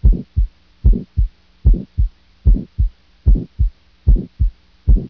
Cardiac and Pulmonary Sounds
cardiac pulmonary anatomy
anatomy, pulmonary